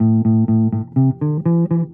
recording by me for sound example to my student.
certainly not the best sample, but for training, it is quiet good. If this one is not exactly what you want listen an other.
bass, sample